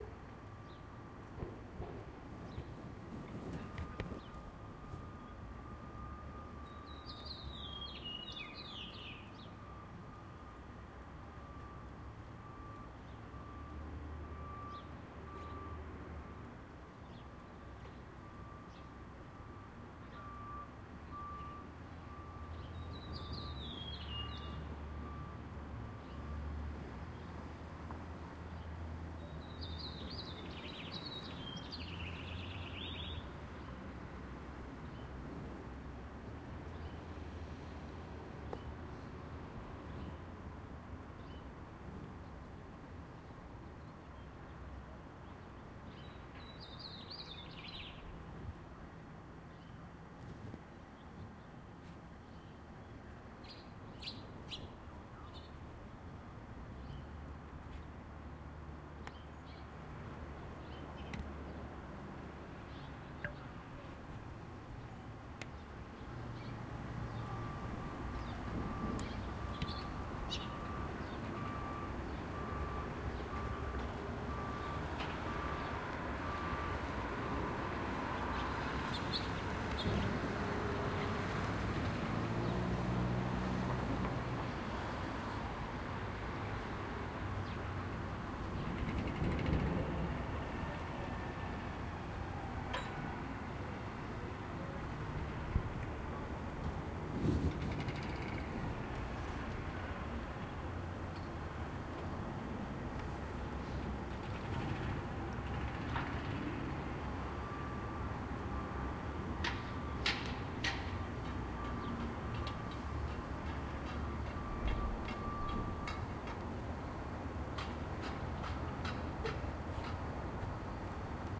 Birds and Construction (ambient)
Simple recording of the outside environment in west Michigan. Birds can be heard chirping and occasionally flying past. Sounds of construction and picked up in the far left channel as well as the occasional worker chatter.
ambient, Birds, construction, field-recording, from-window, moving, nature, outside, sound, spring